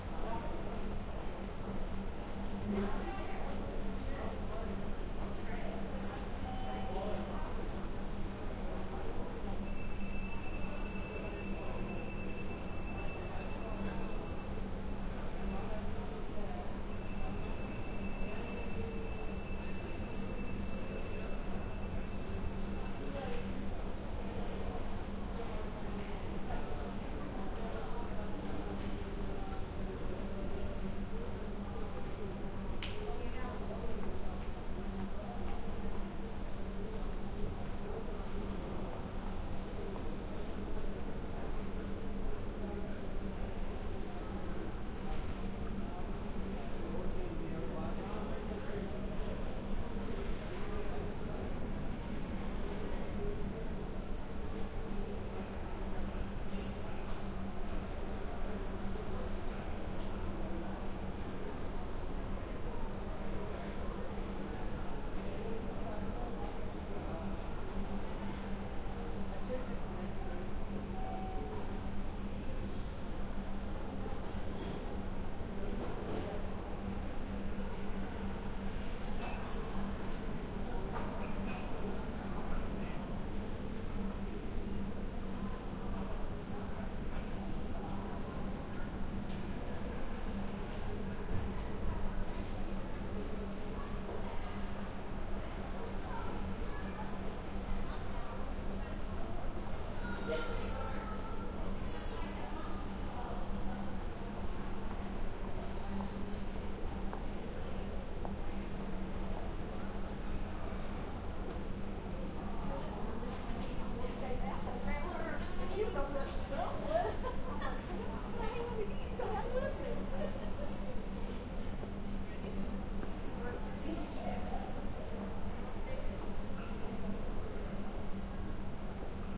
Amb INT HotelLobby vox 0208
Interior of a Chicago Hyatt hotel during convention; elevator chimes in BG
crowd, noise